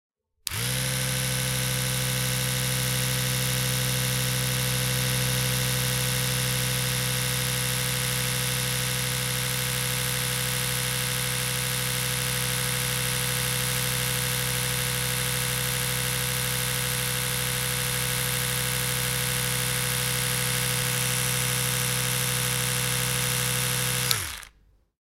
electro toothbrush without head near
Electrical toothbrush without brush-head, "near" position. In some way it sounds like a dental drill.
Recorded with Oktava-102 microphone and Behriner UB-1202 mixer desk.
bathroom, dental, drill, electrical, kitchen, near, toothbrush